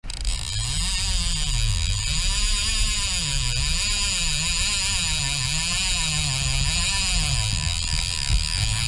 A Hardy Angel fly fishing reel pulling line at fast pace. Recording outdoors so addtional background noise can be heard.